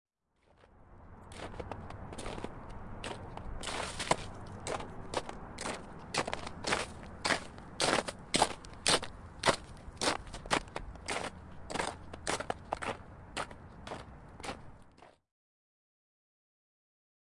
22 hn footstepsSnowHHConcrete2
High Heeled shoe footsteps on heavy packed snow over concrete walkway.
footsteps, snow, high-heeled-shoe, concrete